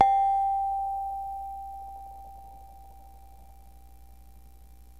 Fm Synth Tone 13
fm, portasound, pss-470, synth, yamaha